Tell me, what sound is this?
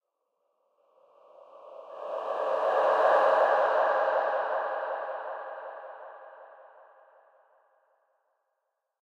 A lot of effort and time goes into making these sounds.
Strange sounds emanating from places best forgotten.
Produced with Ableton.